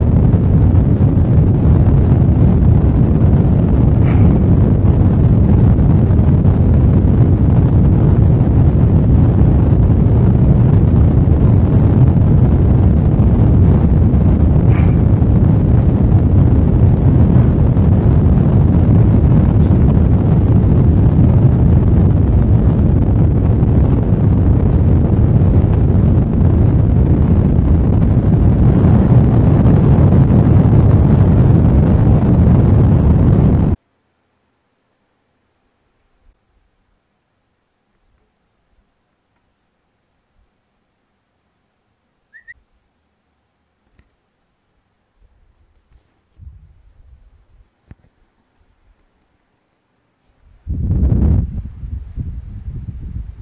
Abstract Soundscape Project
Ben, Goland, Sound